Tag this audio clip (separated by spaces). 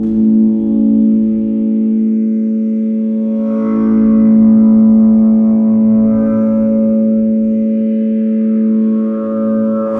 power-station electricity high-voltage alien sci-fi machine flange